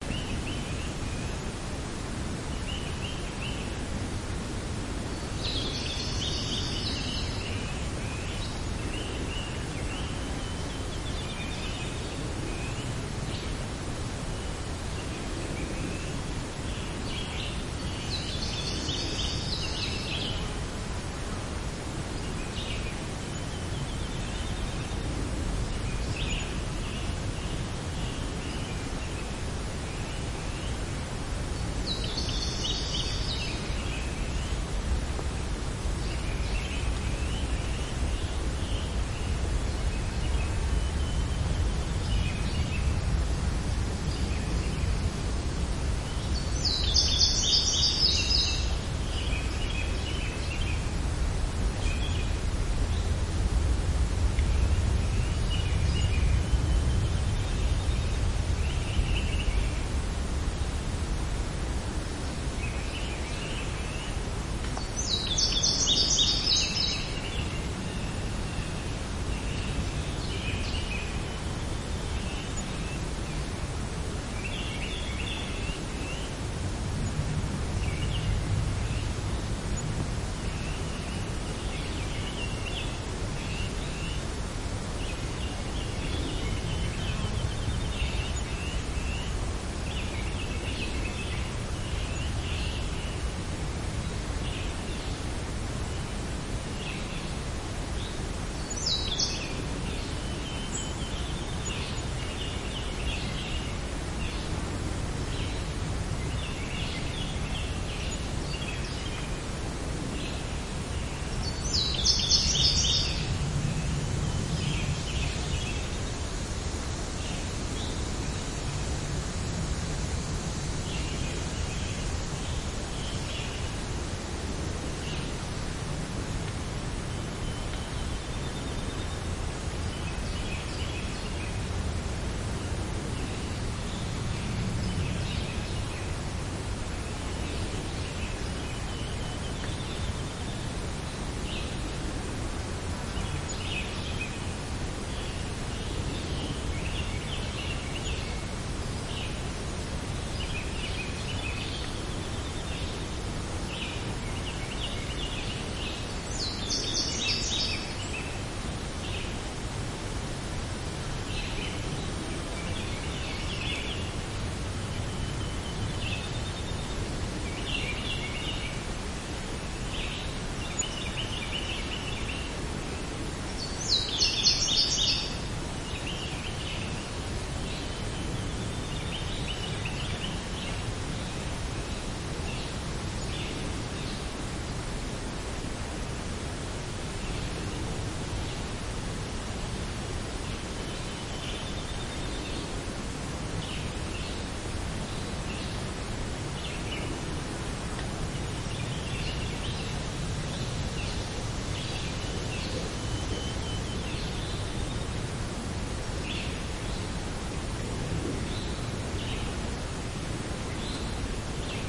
Morning woods ambiance with birds
On a sunny morning, I hiked out into the woods on a gravel road. The hill sloped downward in front of me and upward behind me, and both sides were covered in trees. The breeze rustled through the leaves and birds sang in the mid-morning sunlight.
Recording date: July 16, 2013, mid-morning.
unedited, birds, field-recording, nature, forest, west-virginia